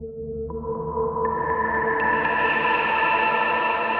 kfa15-120bpm mono
This is the 'mono' version of the sound at:
Which is described as "A collection of pads and atmospheres created with an H4N Zoom Recorder and Ableton Live"
melodic, ambience, polyphonic, pad, atmospheric, calm, warm, electronica, chillout, soft, euphoric, spacey, distance, chillwave, far